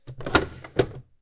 Coffee Machine - Close
Closing a coffee machine